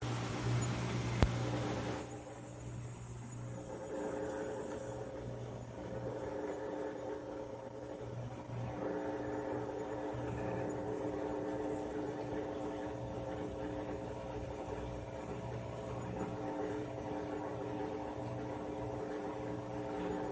Active Washing Machine
Recorded at a distance of about 3-4 inches away from an MCT CENTENNIAL MAYTAG Washing Machine on the "deep water wash," most probably on the "spin" cycle.
Appliance, Clean, Hum, Machine, Washing, WashingMachine